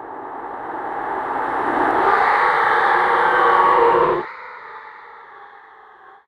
THis is a spooky effect of a ghost sliding by